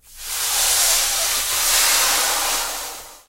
Balloon-Inflate-19
Balloon inflating. Recorded with Zoom H4
balloon, inflate